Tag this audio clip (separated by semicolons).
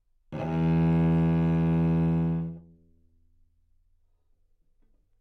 E2; neumann-U87; single-note